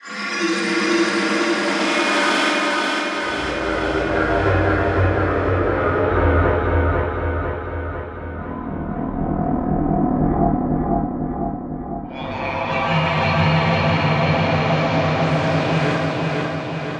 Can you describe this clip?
A scary haunting ghostly style pad